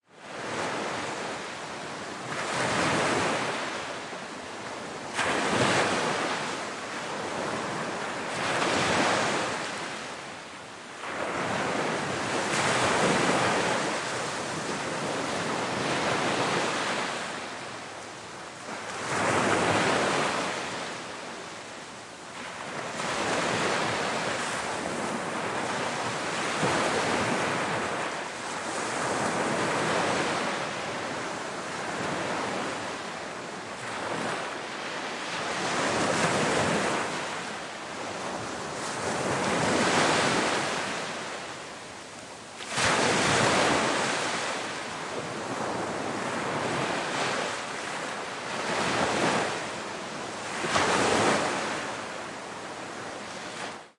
Pattaya beach recorded near the waves with Rode iXY.
beach, sea, water, waves